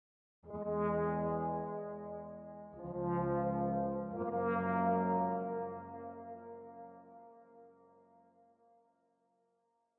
horn lilt 3
It samples String Quartet No. 12 in F Major, Op.